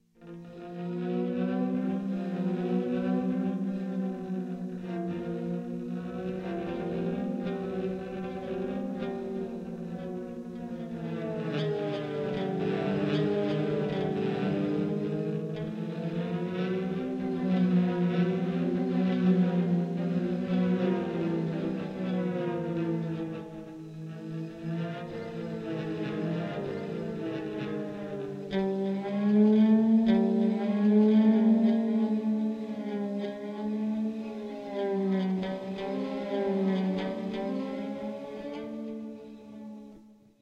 I duplicated a guitar gliding sound (made with a slide, sort of) and mixed the two tracks applying some displacement in time. Was looking for a 'fugue' effect.